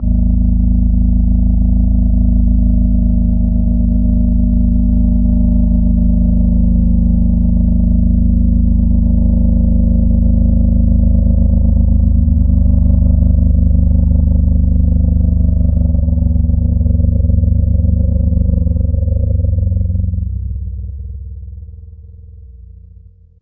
An experiment to see how many sounds I could make from a monophonic snippet of human speech processed in Cool Edit. Some are mono and some are stereo, Some are organic sounding and some are synthetic in nature. Some are close to the original and some are far from it.
dinosaur, sound, low, processed, freqency, bass